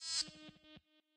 Dont ask, just experimental sounds made by filters at simple waveforms.